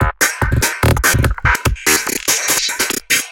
These are heavily processed beats inspired by a thread on the isratrance forum.